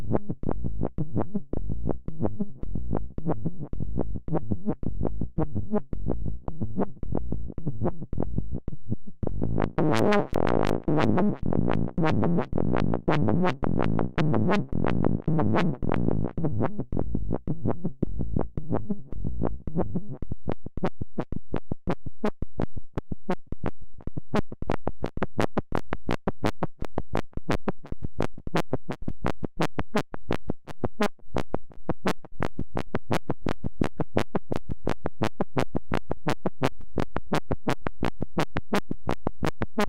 One in a series of long strange sounds and sequences while turning knobs and pushing buttons on a Synthi A.